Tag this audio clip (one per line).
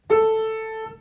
note
piano